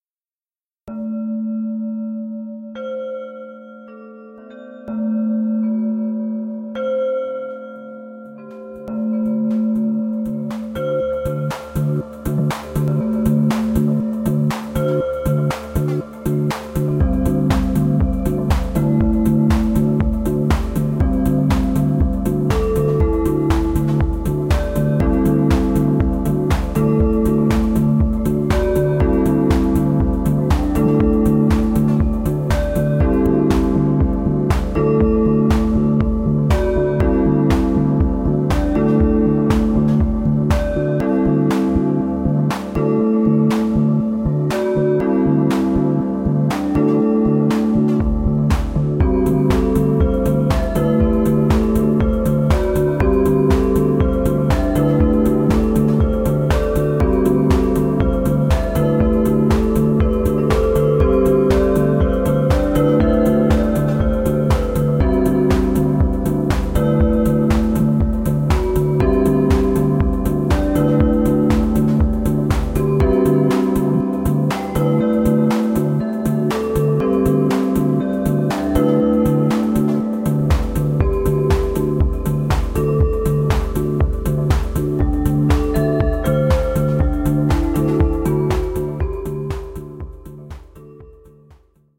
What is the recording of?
bells dance
A ridiculously weird and goofy experimental track combining the sound of bells and dance tunes which I made just for fun a long time ago. Why would anyone need this? I have no idea!
bells, comedy, dance, fast, fun, funny, goofy, ortodox, rhytmic, ridiculous, strange, weird